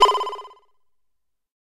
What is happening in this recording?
Analog Synth 01 D#
This sound is part of a pack of analog synthesizer one-note-shots.
It was made with the analog synthesizer MicroBrute from Arturia and was recorded and edited with Sony Sound Forge Pro. The sound is based on a triangle wave, bandpass-filtered and (as can be seen and heard) pitch modulated with an pulse wave LFO.
I've left the sound dry, so you can apply effects on your own taste.
This sound is in note D#.
modulated, electronic, synth, effect, analog-synth, analog, fx, one-shot, pitch, lfo, synthesizer